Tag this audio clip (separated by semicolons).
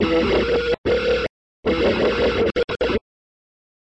dissonant; distorted; experimental; fx